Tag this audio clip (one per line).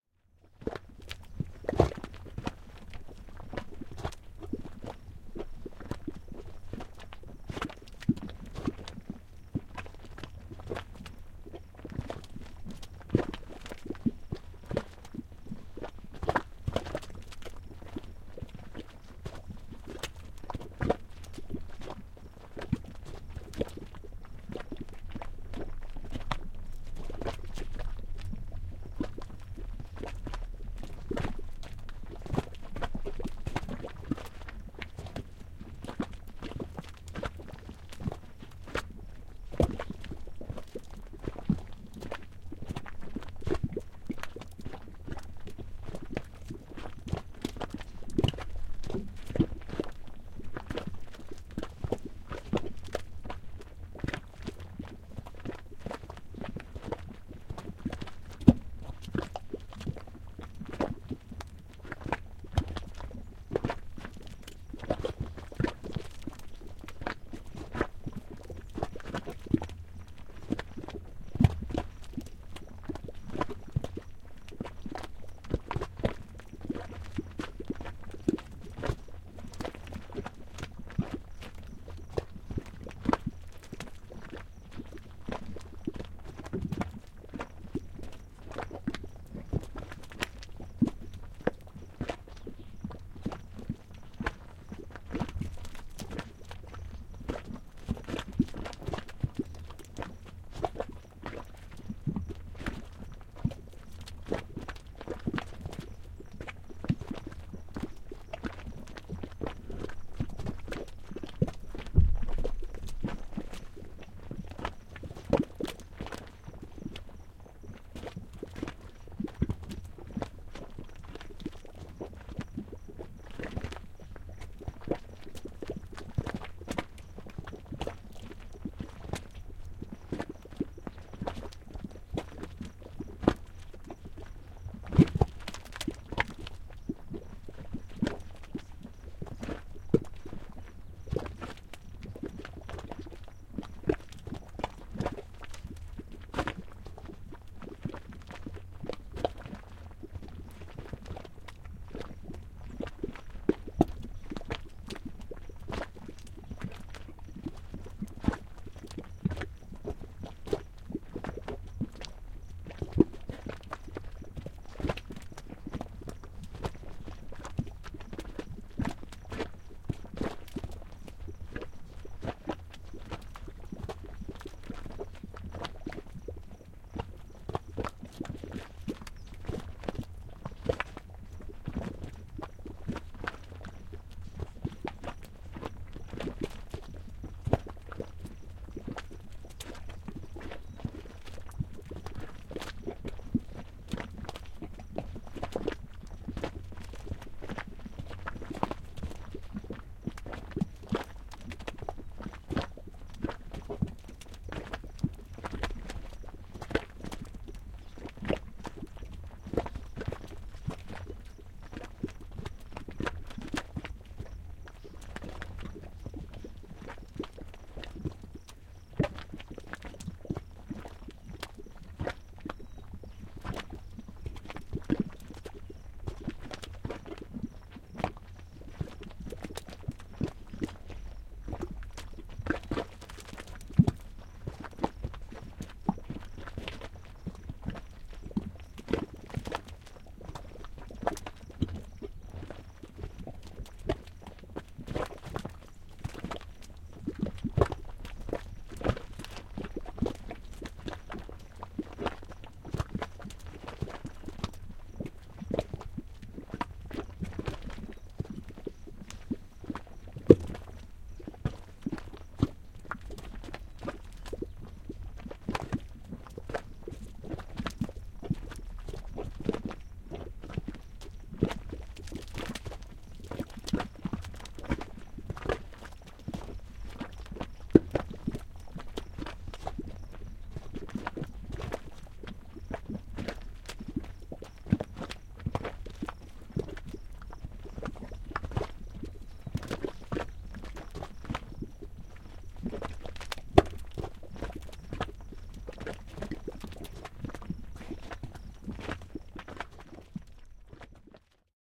ambient
blob
blub
boiling
bubble
cricket
field-recording
glop
mud
yellowstone